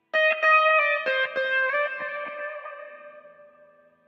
A solo guitar sample recorded directly into a laptop using a Fender Stratocaster guitar with delay, reverb, and chorus effects. It is taken from a long solo I recorded for another project which was then cut into smaller parts and rearranged.